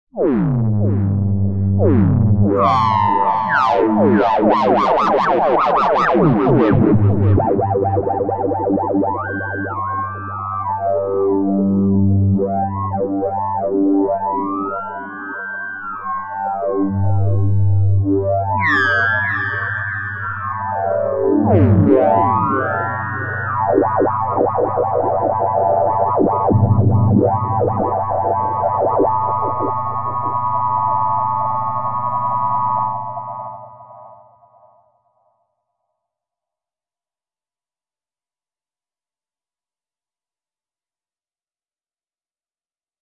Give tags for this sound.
Jason; DeadEvolution

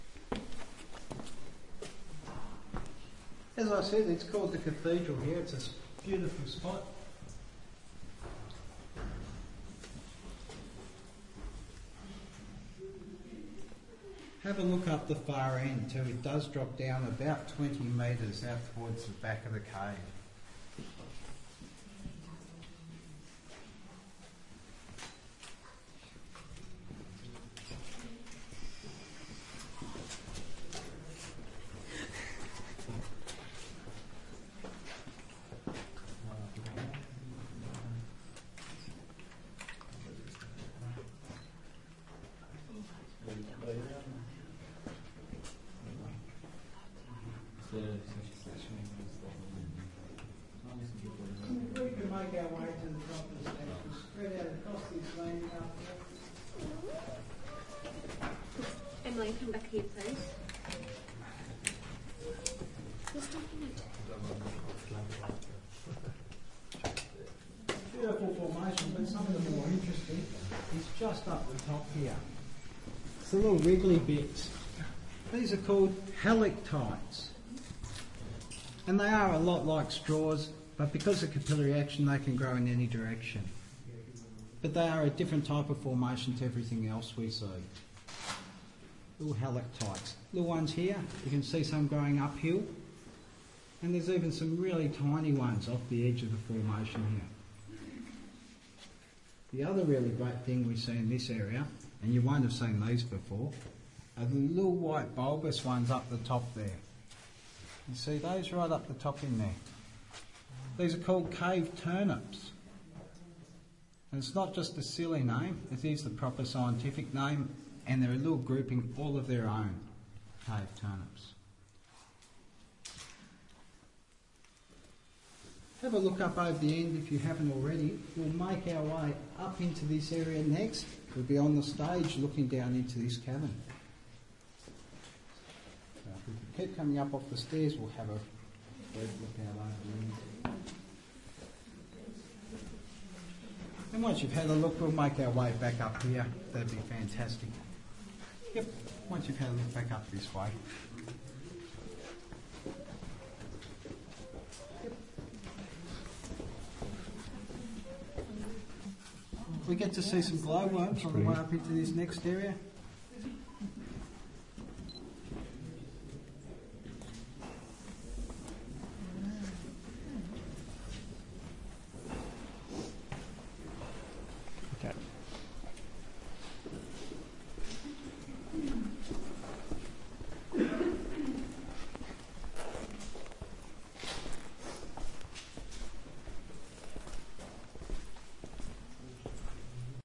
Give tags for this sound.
binaural; cave; cave-tour; field-recording; hastings-caves; newdegate-cave; tunnel; underground

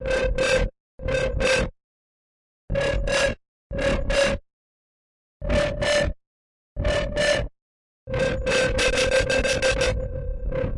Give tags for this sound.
Jump-up; dnB; Dubstep

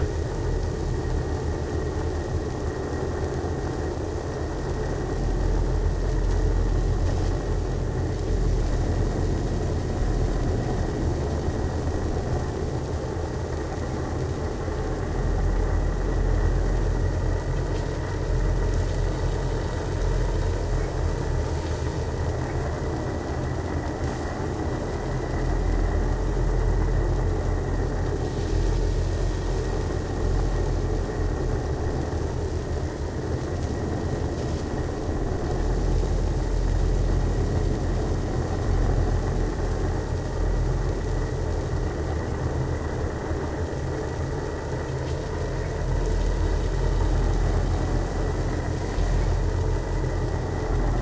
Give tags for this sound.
blow; torch

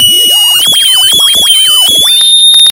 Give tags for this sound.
fake-shortwave; interference; Mute-Synth; radio; short-wave

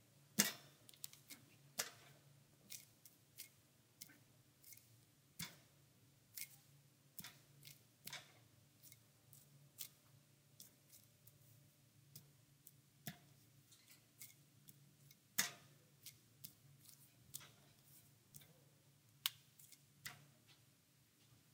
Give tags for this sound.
Shopping; cart; grab; grocery; handle; handlebar; push

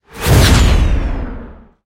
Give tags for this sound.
morph; scary; drone; metal; rise; transition; atmosphere; dark; noise; hit; abstract; impact; glitch; Sci-fi; futuristic; cinematic; opening; game; moves; transformer; metalic; transformation; destruction; woosh; background; horror; stinger

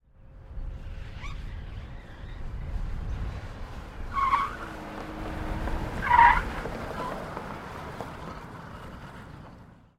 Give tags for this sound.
car; screech; skid; speed; squeal; tire; tyre

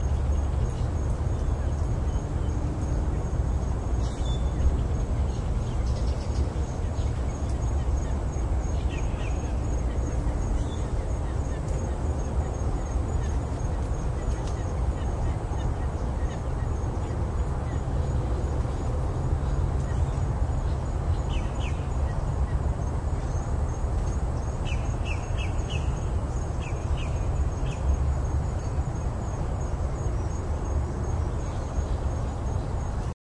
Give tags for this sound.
walking; birdsong; animal; bird; field-recording; song

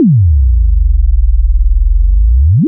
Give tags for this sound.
effect; electric; future; fx; jump; Matrix; movie; sci-fi; sfx; sound; sound-design; sounddesign; sound-effect; soundeffect; sound-fx; strange; Trinity

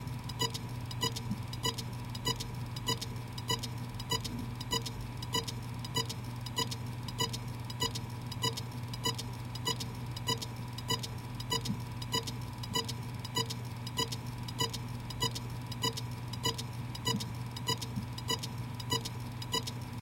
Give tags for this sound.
drive; beeping; clicking; broken; falure; failing; hard; beep; computer; hdd; fail; click; seagate

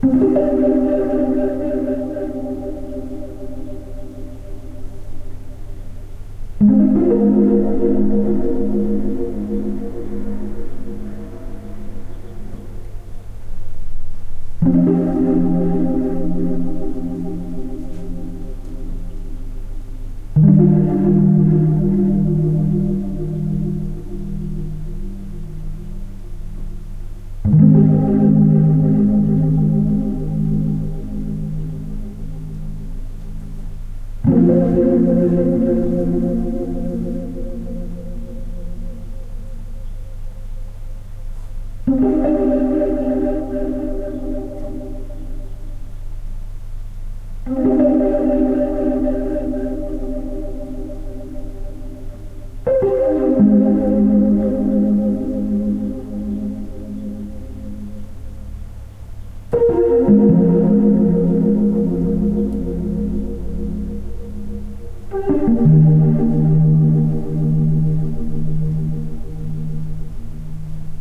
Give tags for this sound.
leslie; haunting; elpiano; chords

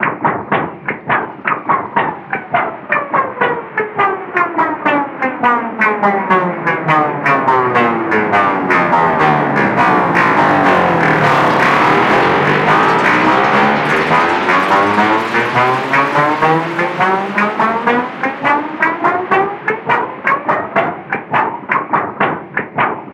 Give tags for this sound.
Loop; Flexer

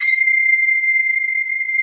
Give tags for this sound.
Combfilter; Multisample; STrings; Synth

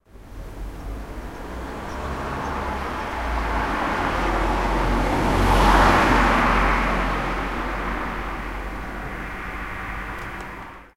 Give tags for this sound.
car; traffic; noise; street

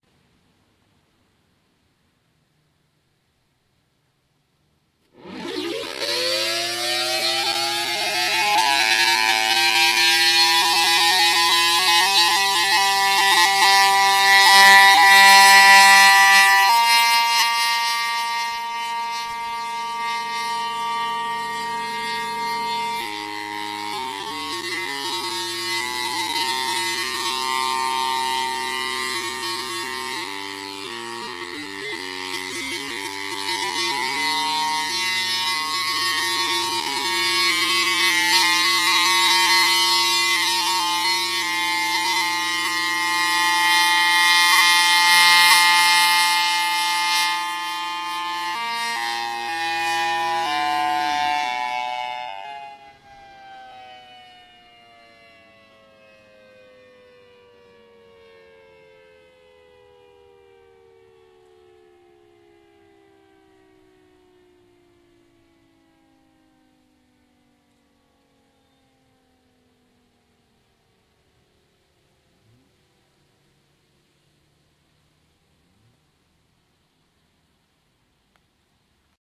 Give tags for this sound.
Sound; Guitar; Antarctica

